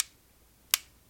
Electronics-Flashlight-Plastic-Button-02
This is the sound of the button on a small handheld flashlight being pressed on then off.
Button, Flashlight, On, Switch, Off, Plastic